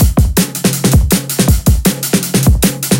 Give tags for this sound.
160-bpm Acoustic beat breakbeat Drum-n-Bass

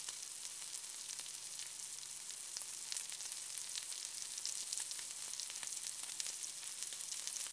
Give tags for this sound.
food fry oil sizzling

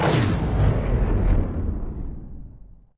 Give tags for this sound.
impact muddy

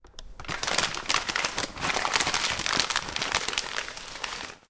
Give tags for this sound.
UPF-CS13 wrinkle paper campus-upf

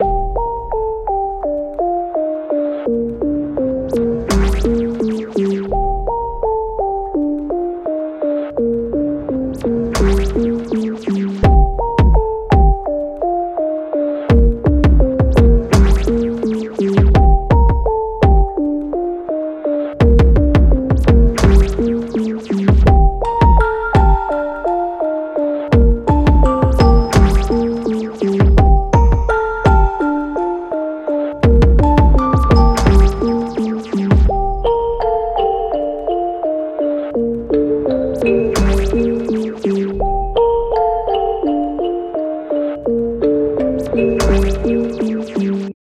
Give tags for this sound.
impact electronic

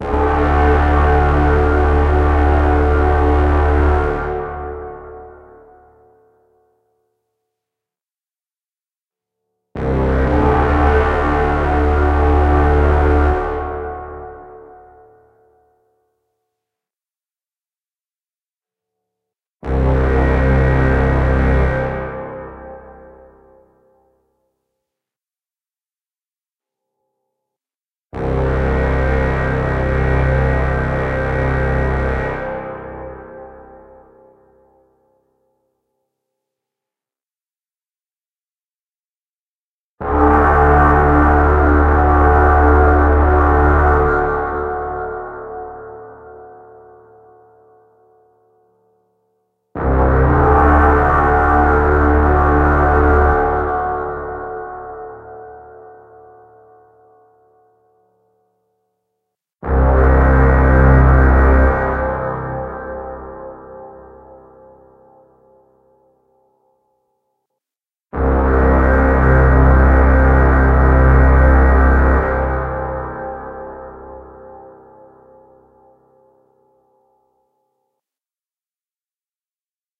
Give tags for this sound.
horn impending

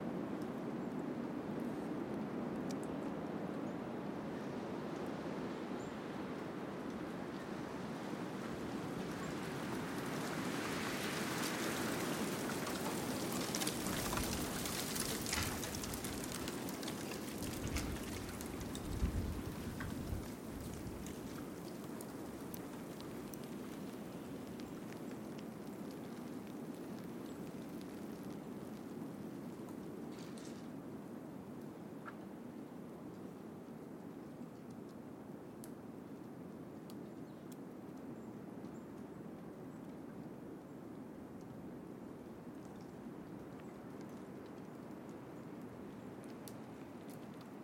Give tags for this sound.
rain stick like tree blow snow forest wind trickle

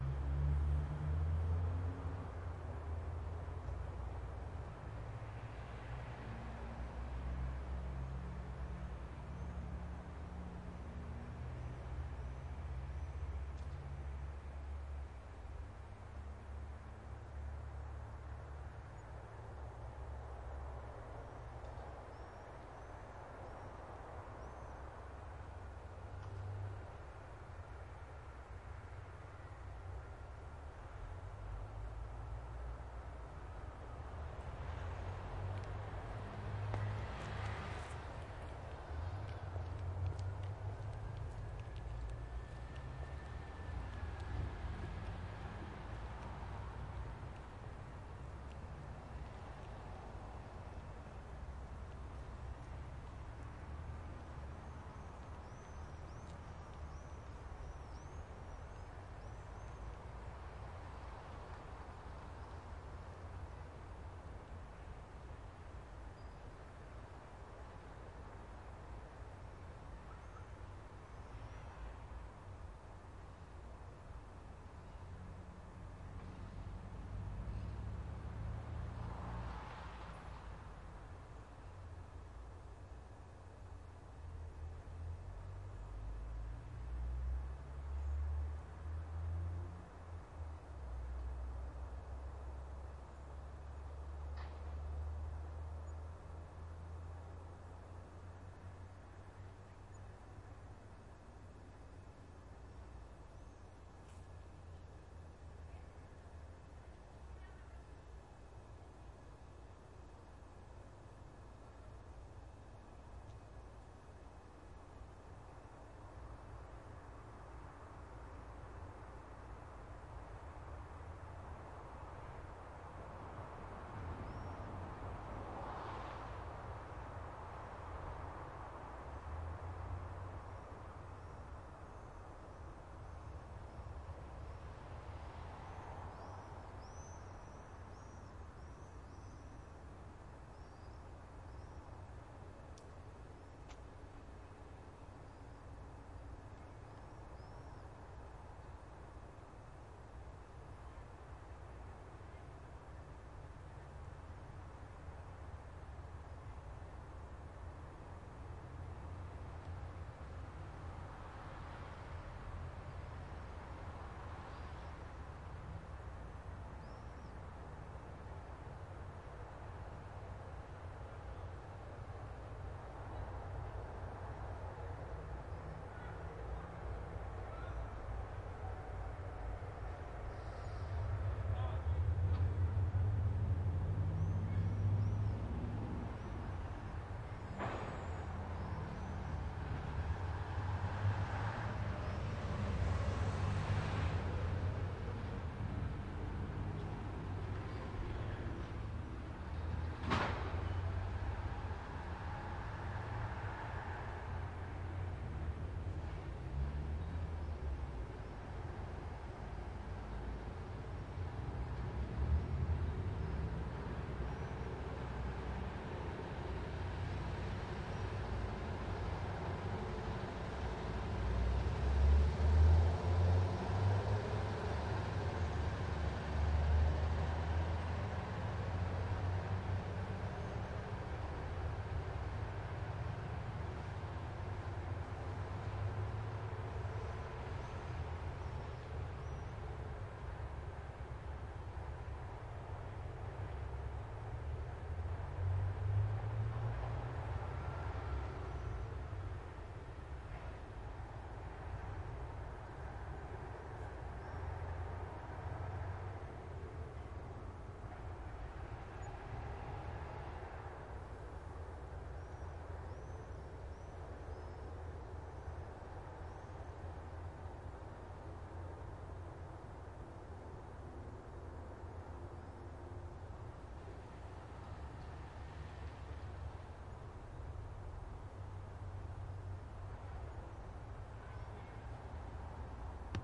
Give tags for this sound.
background-sound road ambience russia suburban soundscape raw cars atmosphere

